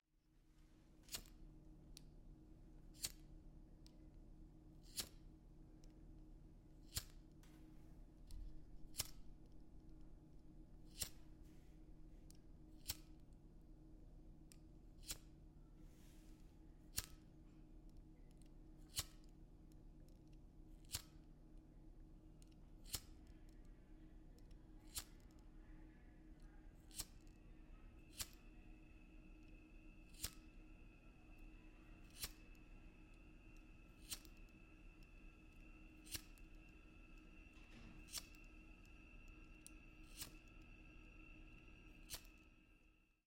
No equipment was harmed in the making of this project. Hearing the sound of a lighter being flicked on and off can bring nostalgia to any former smoker.